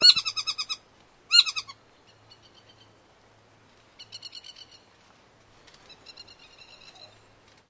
Saddleback / Tieke near, distant
A New Zealand Saddleback (or Tieke) singing. First one bird near-by, then two distant saddlebacks communicating in flight.
bird, field-recording, birdsong, nature